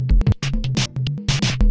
processed with a KP3.
fast, breakbeat